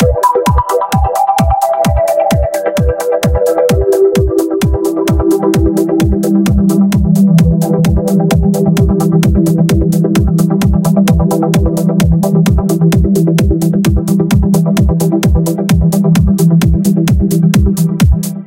Drop Melody Music Beat Trance